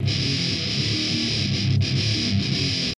off time loop 1
i think most of thease are 120 bpm not to sure
hardcore, loops